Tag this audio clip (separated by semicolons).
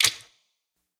button; click; game; mouse; press; switch